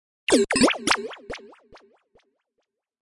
I used FL Studio 11 to create this effect, I filter the sound with Gross Beat plugins.